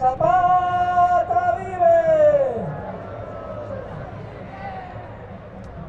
B01h59m27s03oct2007 ZapataVive
Somebody shouting "Zapata Vive!" (Zapata Lives!) during the memorial March / demonstration (2. Okt 2007) for the 2 of October 1968 Massacre in Mexico-City. Recorded on the Main Plaza of Mexico City with Fostex FR2-le and Sennheiser ME66/p6.
2-de-octubre, demonstration, manifestation, mexico, politics, zapata, zocalo